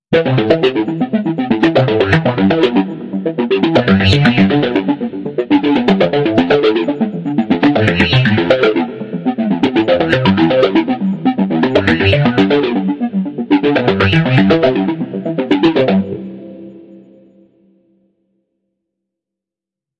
ARP D - var 7
ARPS D - I took a self created sound from the Virtual Korg MS20 VSTi within Cubase, played some chords on a track and used the build in arpeggiator of Cubase 5 to create a nice arpeggio. I used several distortion, delay, reverb and phaser effects to create 9 variations. 8 bar loop with an added 9th and 10th bar for the tail at 4/4 120 BPM. Enjoy!
arpeggio, bass, 120bpm, harmonic, melodic, synth, sequence